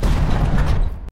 door-open
I needed a big heavy door to slide open pretty quickly. So I started with this excellent sound: 31154__UATaudio__hugeSlidingDoorSlamECM800
and mixed and chopped to get this one.
Hope it helps someone.
sliding, portal, slide, door, open, close, squeaky, opening, heavy, quick, closing, wooden, gate